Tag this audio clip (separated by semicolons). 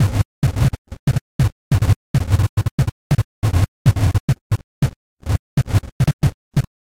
broken; electronic; noise